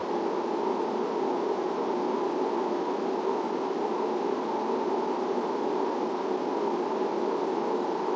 This is an synthetic wind, created with an equalizer with a resonance. It has a quite strong wind quality.